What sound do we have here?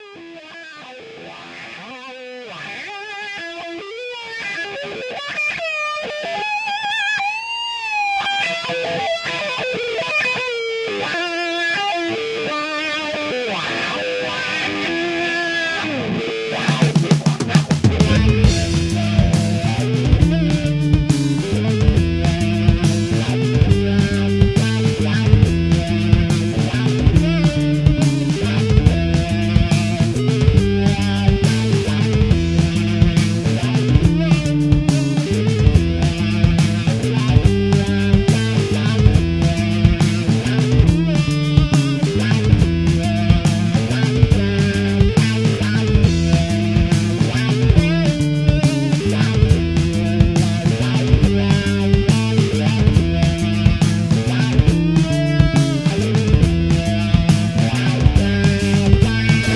Backward Guitar Riff 1
1973 Fender Stratocaster guitar recorded thru 1972 Marshall Plexi head mic'd with a Sennheiser 421 through a Spectra Sonics 110 Mic Pre compressed with Urei LA-3 and recorded on a 2" Analog 3M 24-track recorder at 30IPS. Artist is Ajax